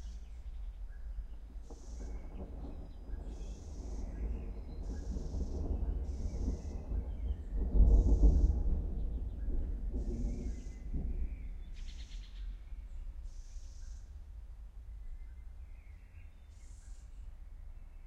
A single thunderclap, recorded at the beginning of June, using a FEL preamp and Shure wl-183 microphones into an iriver ihp-120, which you might noticed is my favourite recording setup at the mo.